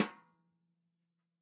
Drums Hit With Whisk